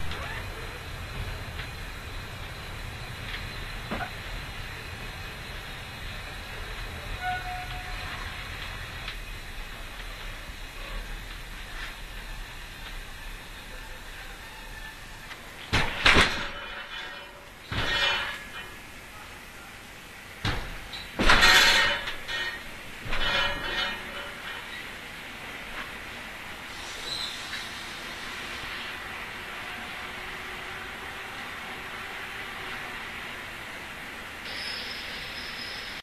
lye-by 191110
19.11.2010: about 6 a.m. lay-by near of central train station in Wroclaw. the sound of connecting two trains.